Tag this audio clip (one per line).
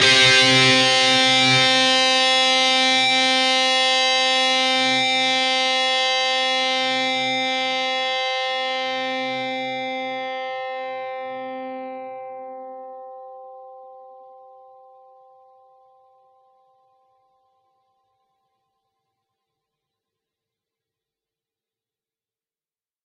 distorted
distorted-guitar
distortion
guitar
guitar-chords
lead-guitar